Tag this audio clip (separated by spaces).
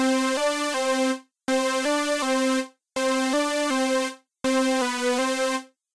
162-bpm distorted hard synth